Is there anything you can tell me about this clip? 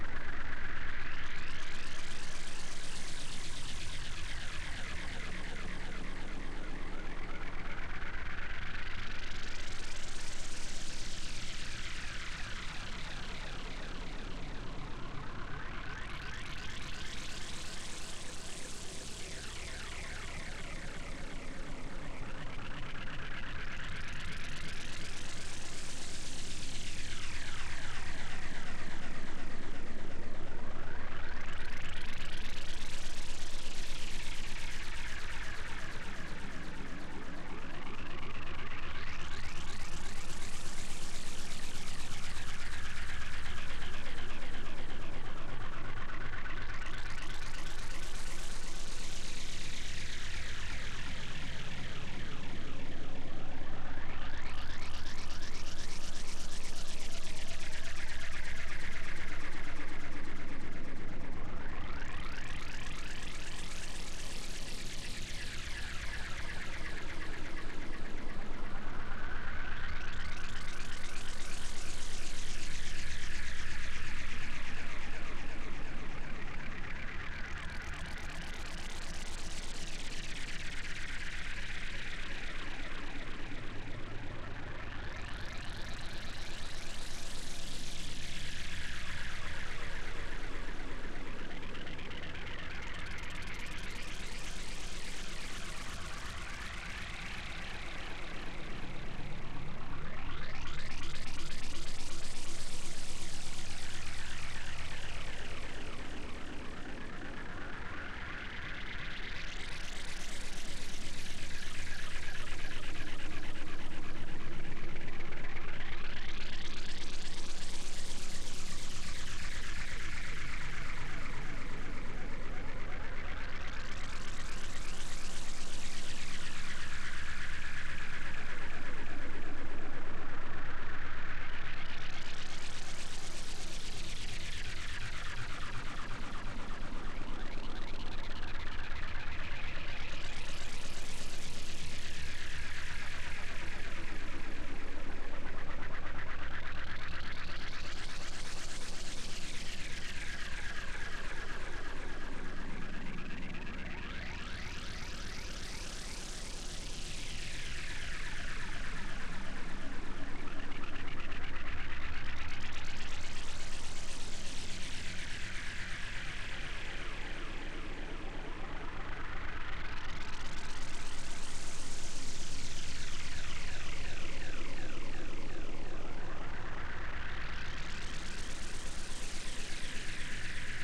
This happened by accident: Some stuck notes in a Vitalum patch with alots delay and white & perlin noise LFOs.